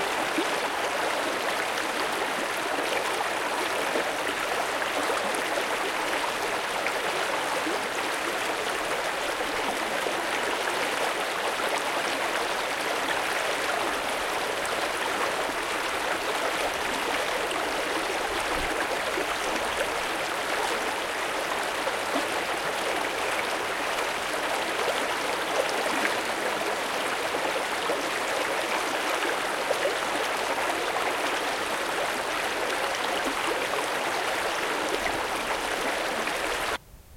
Field-recordning of an actual stream in the countryside of Sweden.
Recording-date:Unknown. Sony digital equipment (16 bit) and a Sony stereo-mic (ECM-MS 907)